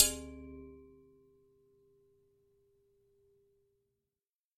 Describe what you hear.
This one has quite a resonant tail.
field-recording, impact, metal, metallic, ping, resonant, sword